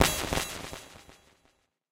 A percussive synth sound with delay.
This is part of a multisampled pack.
delay, multisample, one-shot, synth